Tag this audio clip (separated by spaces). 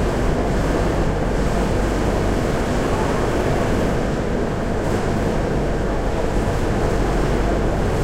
factory field-recording grinding indonesia tea